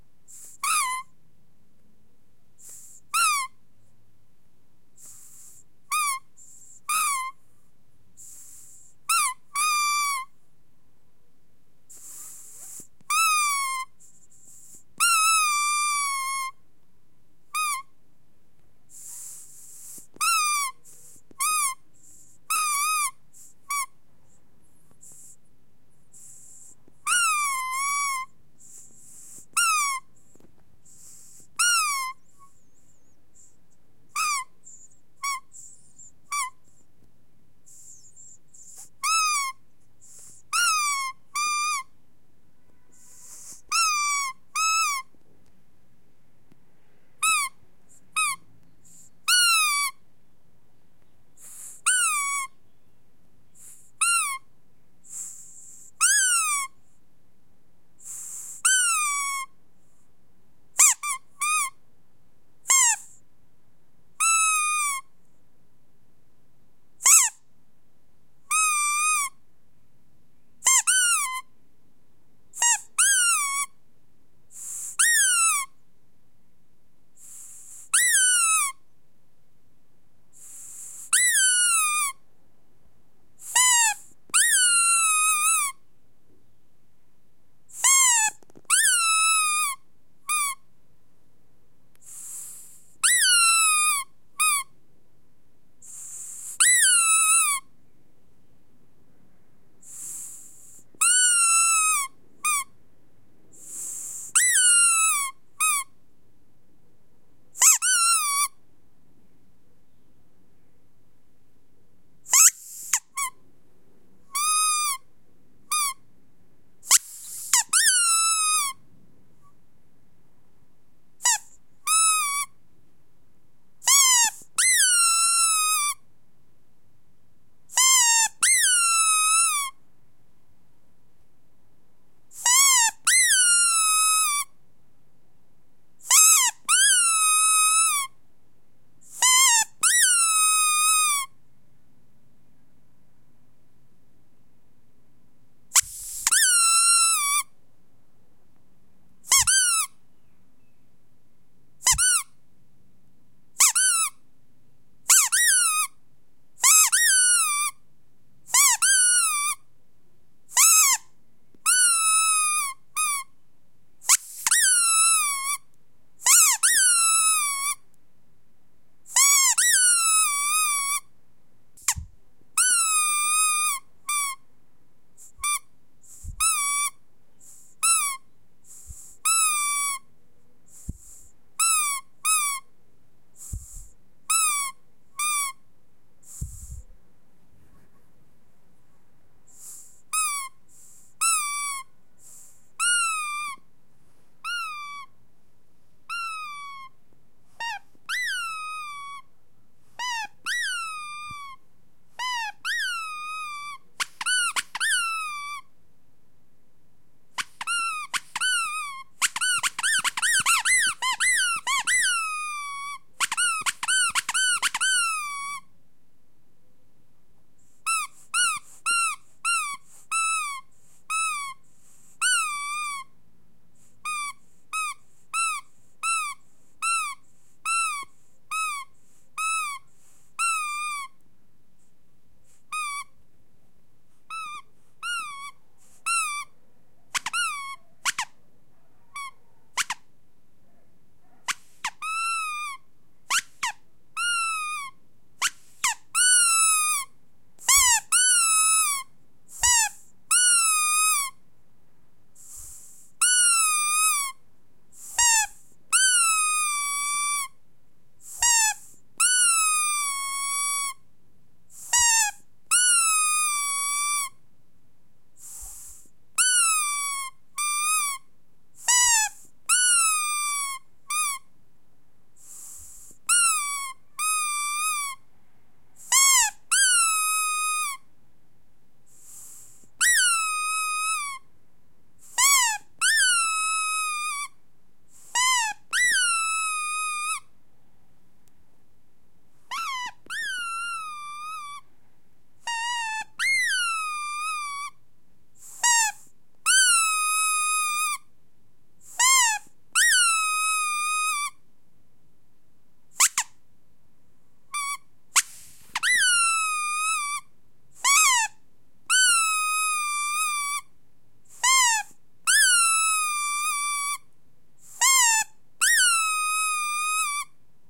squeak-toy-squeeze-sad full01
A rubber squeaky toy being squeezed. It sounds sad, almost as if it's crying. Recorded with a Zoom H4n portable recorder.
cry, crying, sad, squeak, squeaky, squeezy, toy